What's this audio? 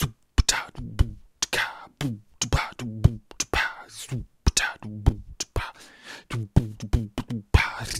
Beat umka 4b 120bpm
beat, beatbox, bfj2, dare-19, loop